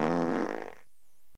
Trimmed fart
A fresh cut fart used for cartoons, podcasts, Minecraft montages, etc.
cartoon, fart, funny, meme, silly, trimmed